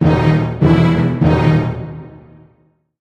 Stereotypical drama sounds. THE classic two are Dramatic_1 and Dramatic_2 in this series.